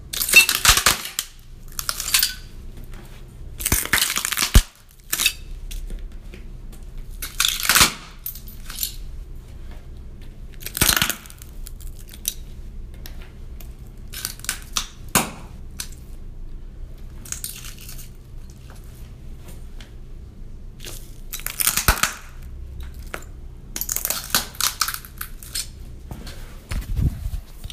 Me standing on broken glass. Useful for broken bones etc.
crunching, Glass, broken, cracking